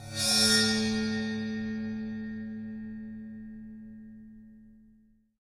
Bowed Mini China 02
Cymbal recorded with Rode NT 5 Mics in the Studio. Editing with REAPER.
one-shot, special, sample, zildjian, groove, bowed, sabian, meinl, metal, china, splash, crash, cymbal, beat, drums, paiste, percussion, sound, cymbals, drum, ride, hit, bell